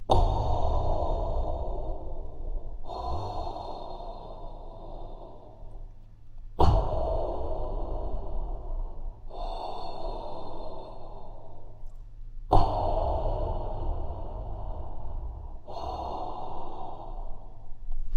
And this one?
Darth Vader Breathing
Just did a breathing exercise in the mic :D